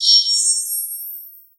001-Bright Ice
Computer or Mobile Chat Message Notification
bell, bright, chiming, crystal, ping, sci-fi, sharp, wide